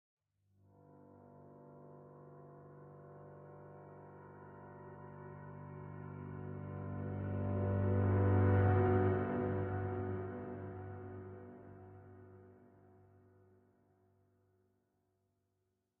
(Low) G Major 7 [Reversed Piano Ambient]
This is my third track to my atmosphere creator pack. This time, it's a low chord.
Steinway D' 9 Foot Grand, recorded and Imported into DAW. Reverbed and Reversed.
Have fun in using my samples.
ambience, ambient, atmosphere, dark, pad, piano, reverse, soundscape, soundtrack, suspense